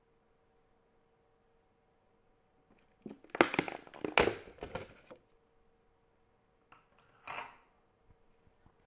plastic lid opening
opening a plastic container and placing the lid down. recorded using Marantz professional recorder with shotgun mic